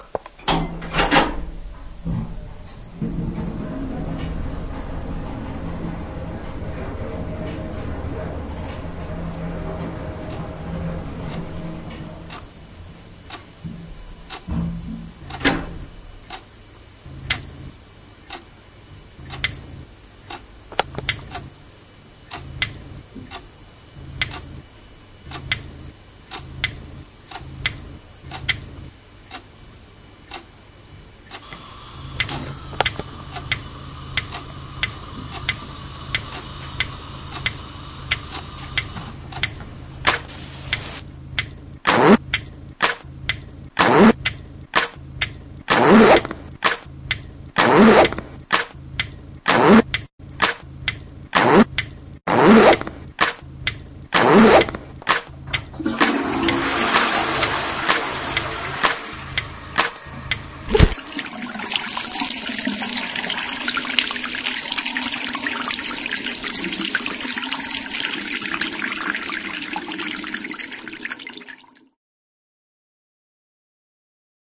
Here's the SonicPostcard from Sidney & Andreas, all sounds recorded and composition made by Sidney & Andreas from Mobi school Ghent Belgium